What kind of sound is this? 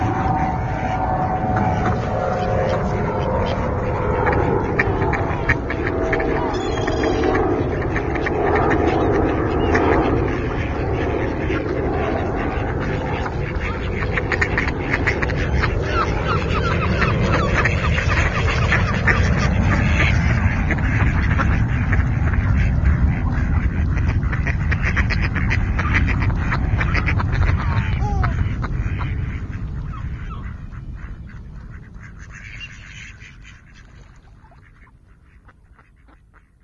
Avião e Patos Parque da Cidade
This is a sound of ducks and a plane passing by Parque da Cidade. This sound was recorded with our handmade binaural microphones.
ducks, plane, ulp-cam